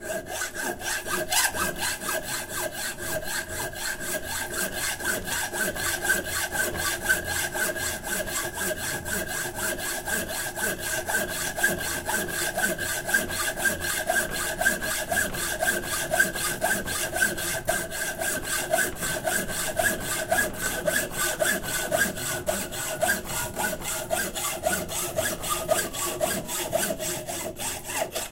Sawing metal with a hacksaw.
The record was not edited in soft.